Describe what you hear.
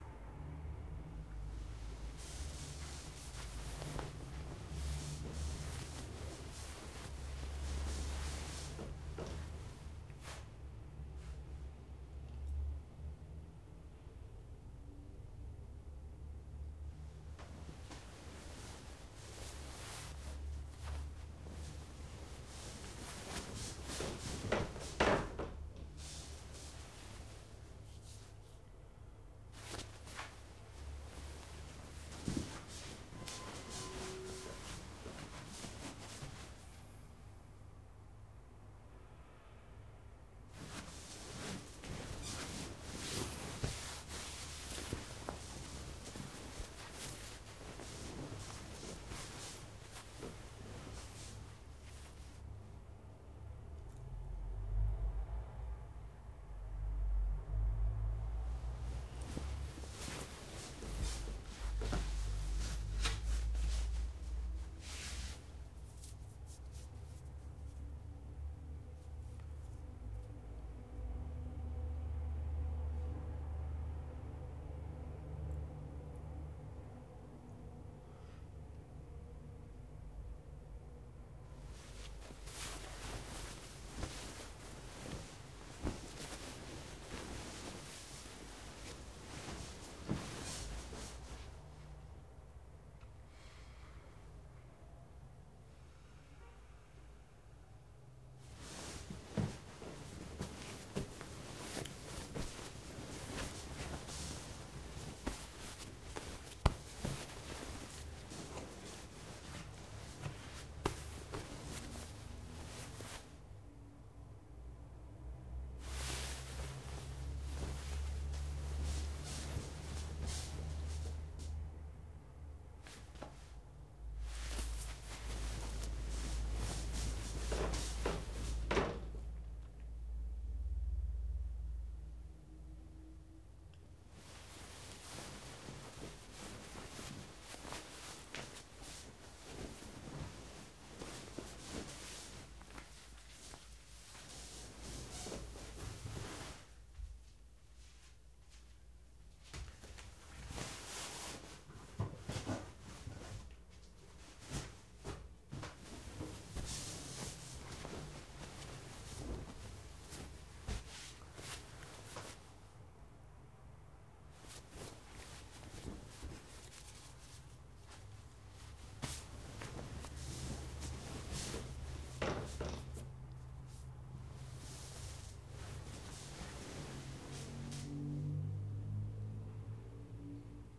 cama cobijas ciudad nocturno
sonido de una persona en la cama. sound of one person on bed
wake-up, cobijas, clothes, ropa, acostado, bed, durmiendo, cama